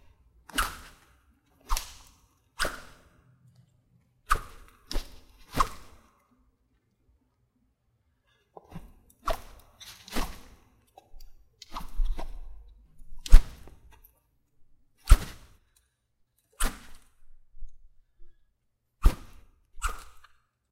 This sound is a katana sword being swung close to the microphone it was recorded in a gym. It was recorded using a Zoom H1 with foam windscreen attached. The clip was cleaned up (removed air condition sound) in Adobe Audition CS6. You can hear some handling of the sword at some points. Some swings have a higher pitch than others but this is the real thing.
sword-slash, swoosh, martial, weapon